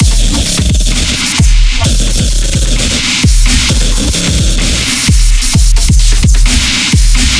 Noisy dubstep loop 130bpm
Noisy dubstep loop...